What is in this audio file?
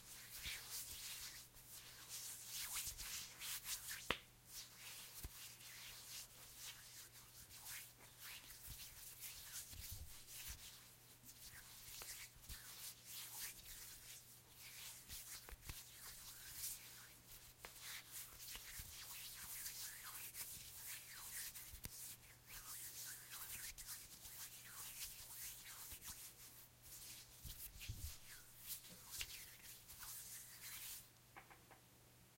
Recorded with Rode VideomicNTG. Raw sound so you can edit as you please. Me passing my hands on my bare face or forearms so mimic the sound if skin that is touched or caressed.